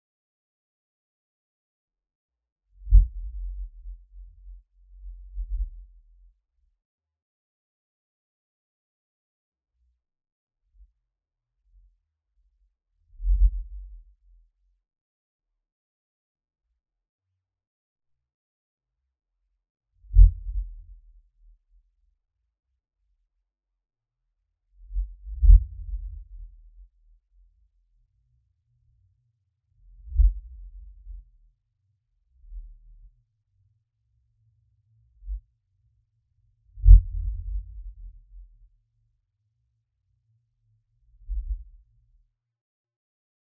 Filtered - 21432 daveincamas May 18 1980 Mt. St. Helens Eruption From 140 Miles Away
This is a filtered version of daveincamas "Mt. St. Helens Eruption From 140 Miles Away" recording. I examined the sound in a spectral analyser and filtered all the sounds that were not related to the low-frequency booms.